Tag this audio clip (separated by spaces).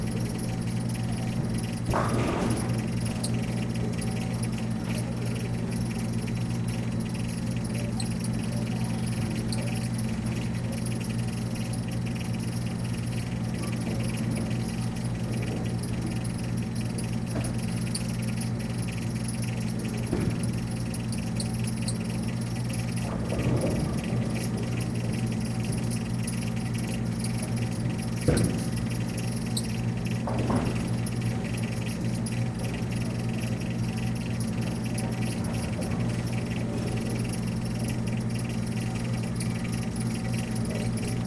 soda noise hum machine